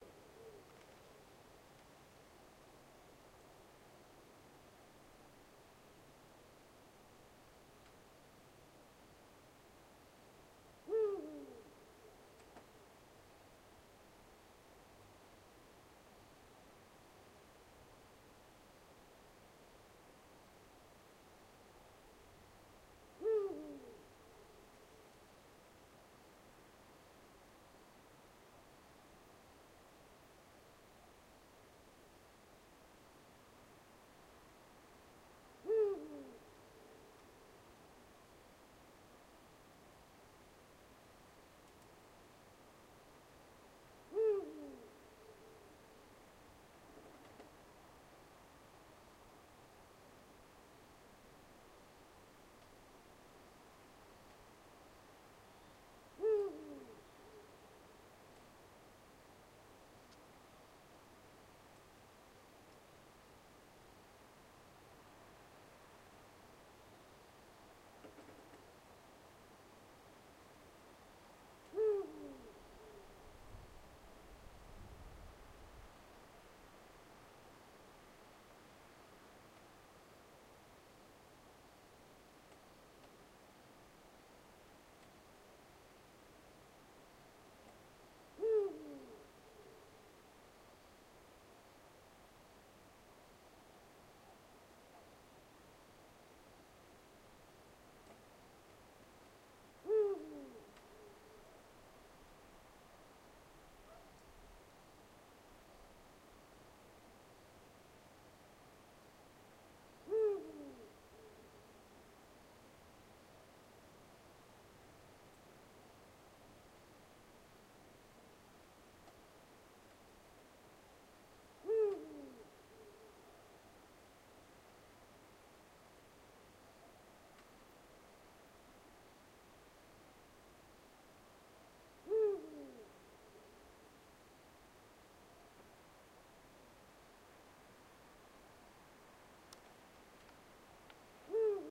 Hibou Grand Duc
An european Eagle-Owl I heard very close, in a high tree in my garden, in a small town, south of France.
bird, bird-of-prey, eagle-owl, field-recording, nature, night, Owl